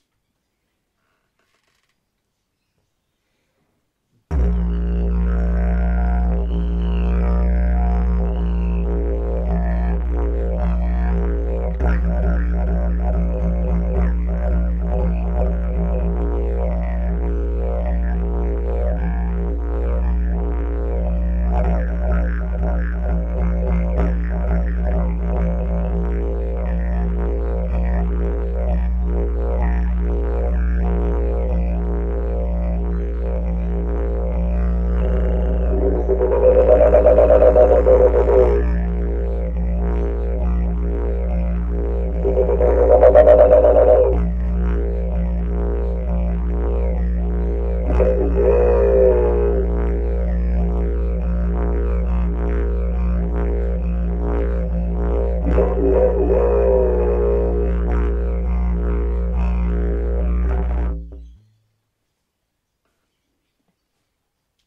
This recording was taken with a Rode NT4 mic and with a Didge of mine in the key of B from northern Queensland (Kuranda).